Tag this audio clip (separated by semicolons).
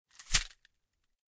glass; shake; shuffle